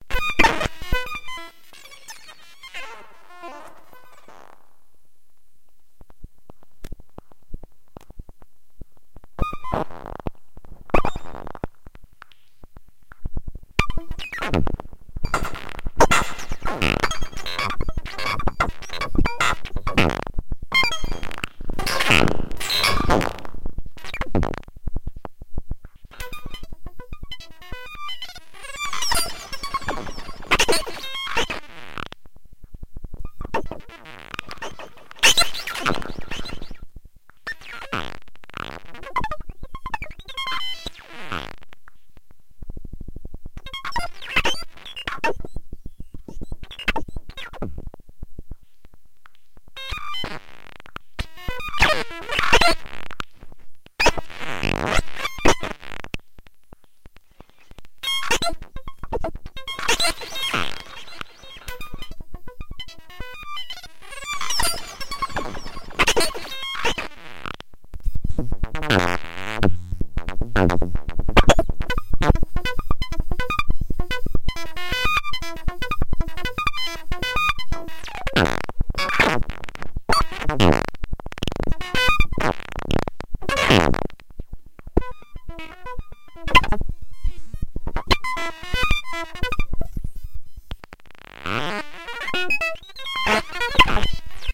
Modular synth - 1 April
diy, synthesizer, modular, analog